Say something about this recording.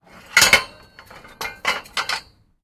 Metal handling bars in container 3
Metal handling bars in container